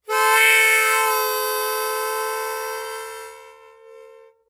Harmonica recorded in mono with my AKG C214 on my stair case for that oakey timbre.